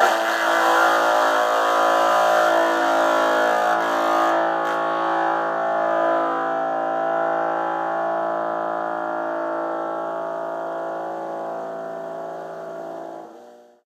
Electric guitar being routed from the output of a bass amp into a Danelectro "Honeytone" miniamp with maximum volume and distortion on both.